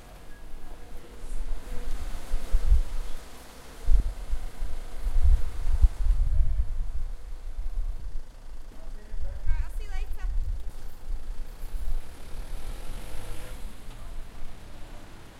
This is a stereo voice of street, it's so quiet.